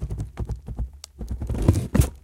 Moving a heavy object on concrete